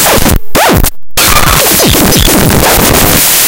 Highly distorted an rhythmically dense recorded scratch. Loops well.